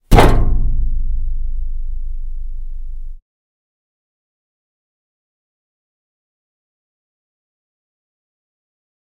A hand slapping a piece of metal, a variation.